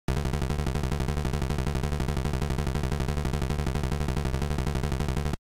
8-bit Wavering Text Scroll C#1 202 A06 3
A sound created in Famitracker centered around the note C# of the 1st octave that could be used during text scrolling.
text, 8-bit